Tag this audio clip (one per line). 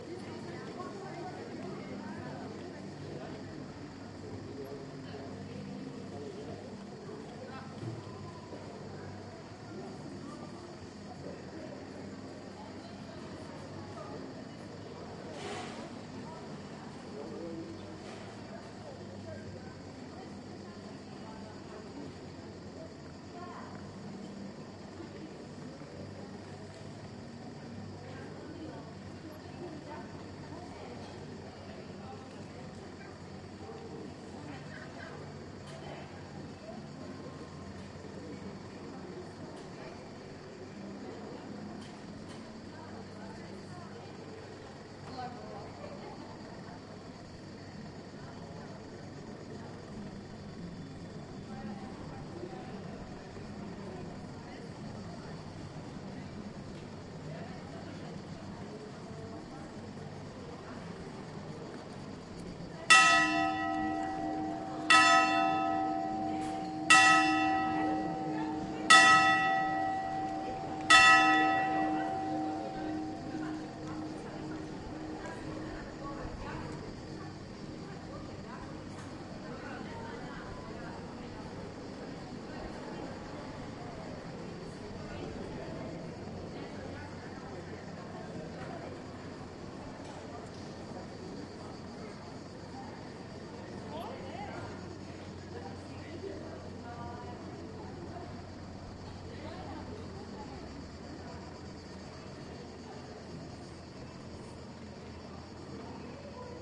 southern
summer
square
cafe
europe
surround
people
tourist
town
atmo
mediterranian
field-recording
evening
mid-range
trogir
croatia